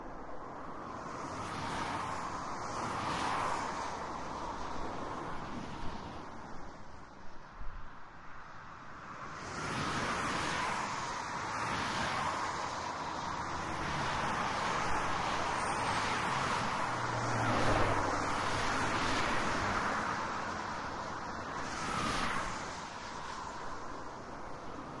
German Autobahn on a sunday afternoon